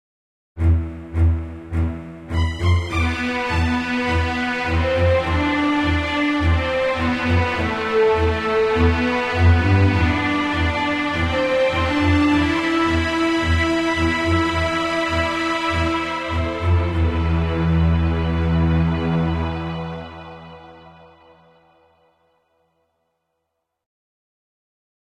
Kojiro's Trips (slow)
Strategy, FX, Cinematic, Powerful, Dramatic, Orchestral, Drums, Strings, Epic, Game, Octave, Loop, Movie, Percussion